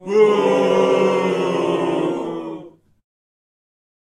Recorded a small crowd of people booing with disapproval. Was done with a condenser microphone and a sound/mixing program, Reaper v4.77. Suitable for radio effect.